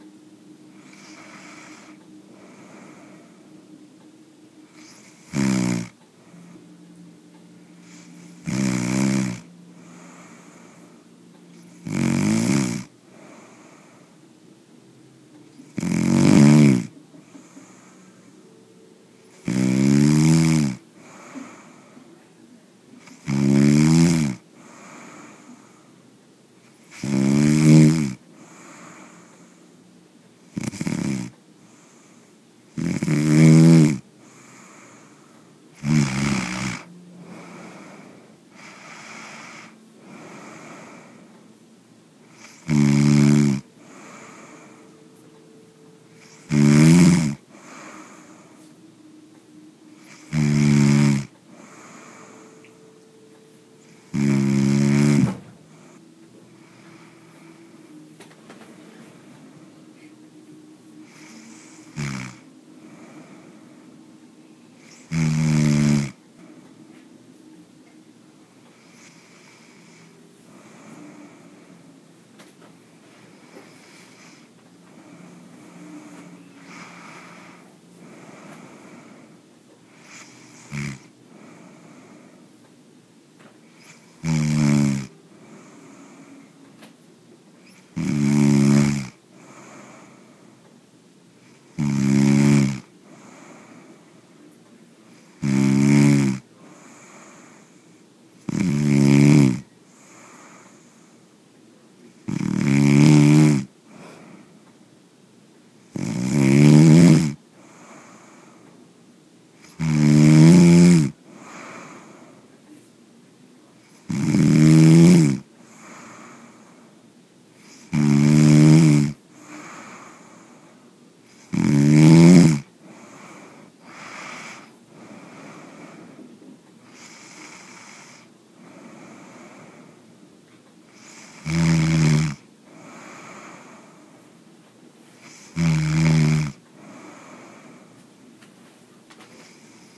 A short recording of someone snoring.